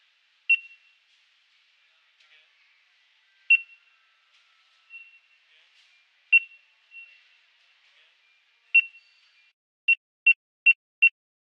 Market scanner beep
Recorded this with Zoom h6 + NTG3. Did some postproduction (just EQ) because of the noisy environment. First 4 beeps contain reverb information, last 4 beeps are isolated (dry).
beep, hypermarket, market, scanner, store, supermarket